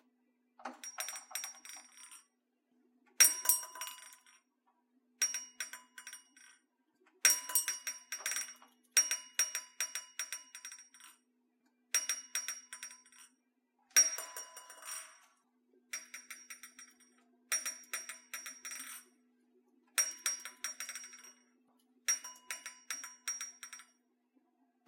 Office/Store Door Bell Jingle (on a string)
Recording of bell hanging off our office door as the door swings open and closes. Recorded on Sony M10.